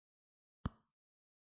Sound of a single finger tip on a touch screen. Recorded with H2n, optimised with Adobe Audition CS6. Make sure to check the other sounds of this pack, if you need a variety of touch sreen sounds, for example if you need to design the audio for a phone number being dialed on a smartphone.
screen, touch, touch-screen, touchscreen